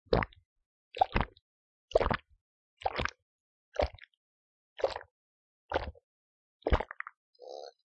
Wet sounding gulps with zero noise.